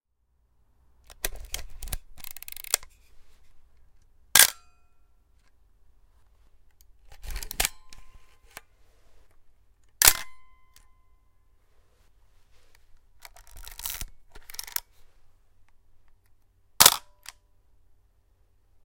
3 Analog Camera Shutters
the shutter sound of 3 different analog cameras, Pentax Spotmatic, Nikon EM and Chinon CX